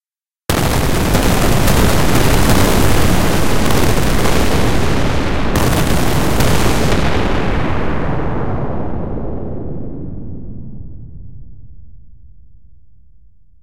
spaceship explosion5
made with vst intrument albino
atmosphere, battle, blast, energy, explosion, fighting, fire, firing, future, futuristic, fx, gun, impact, impulsion, laser, military, noise, rumble, sci-fi, shoot, shooter, shooting, soldier, sound-design, space, spaceship, torpedo, war, warfare, weapon